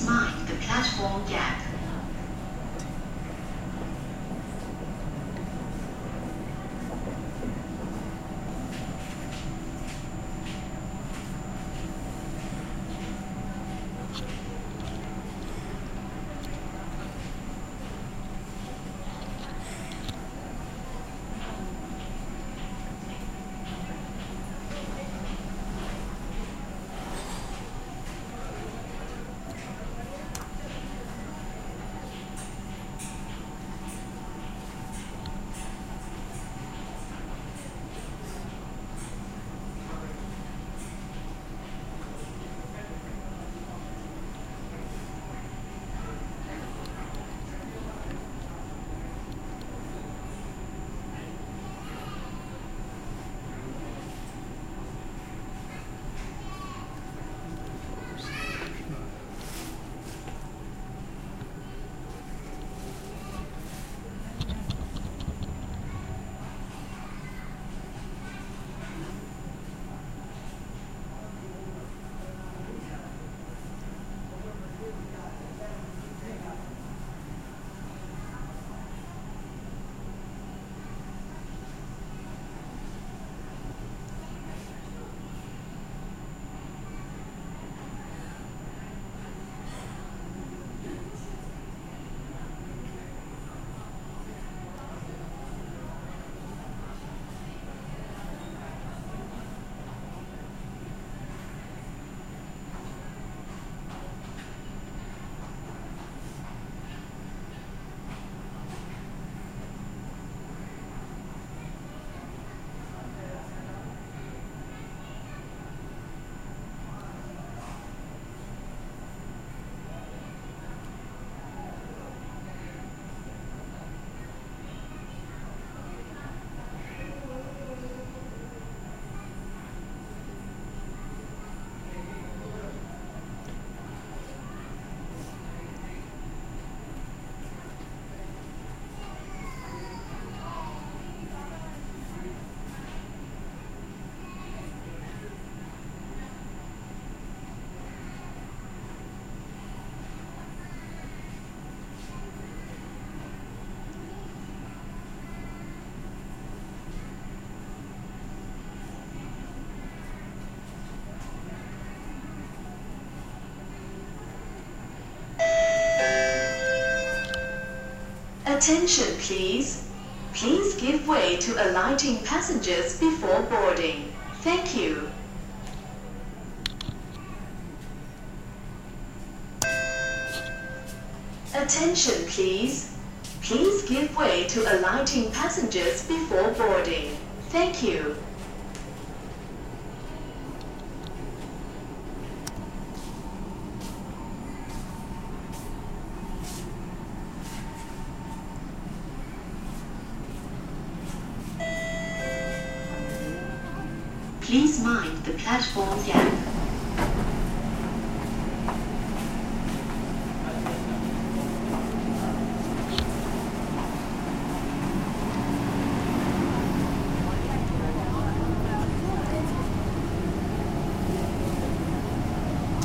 singapore-mrt-give-way2
Station ambience, followed by
"Attention please, please give way to alighting passengers before boarding. Thankyou."
(twice) and then
"Please mind the platform gap"
mind-the-gap, mrt, singapore, station, train